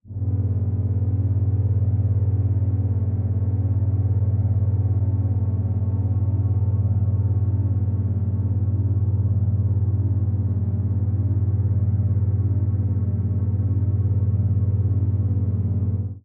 Machine Rumble Drone 1 4
Synthetized using a vintage Yamaha PSR-36 keyboard.
Processed in DAW with various effects and sound design techniques.